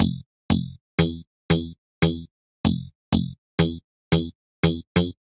Live Edge Bassynth 01
dark electro bass synth
bass, electro, synth